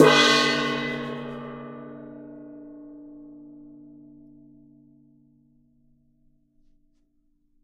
A large metal pot held by hand is struck on the bottom, producing a noisy gong-like attack without the pot's deeper resonance in the tail of the sound.(Recorded with a stereo pair of AKG C414 XLII microphones)